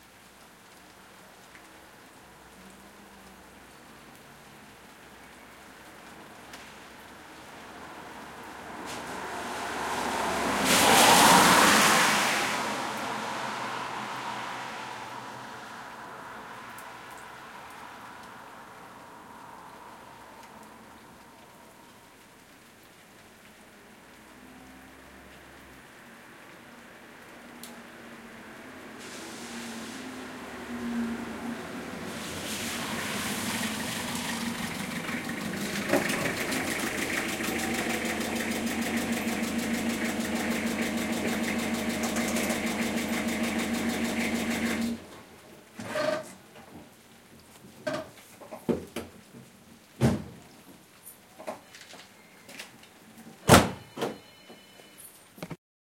doppler coche y coche aparcando lluvia
car, rain, doppler